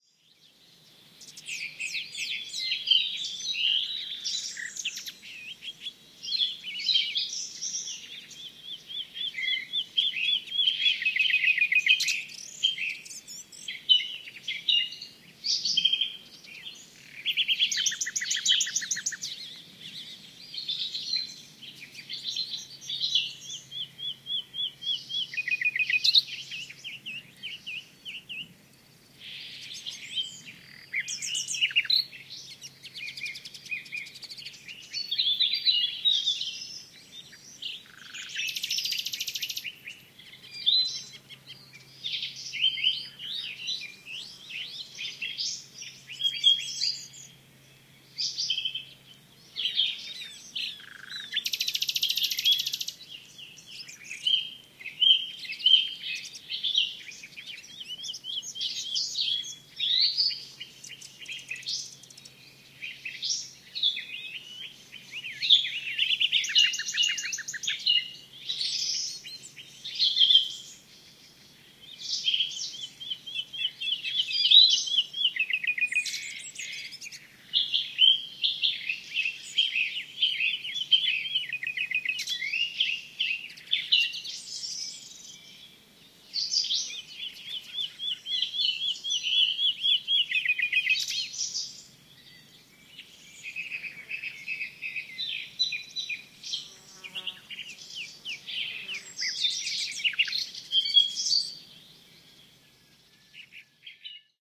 A mix of a number of different birds I recorded during a recent field recording session. I am also in the process of uploading lots of dawn chorus which I recorded in May
Bird-Song, Field-recording, Summers-day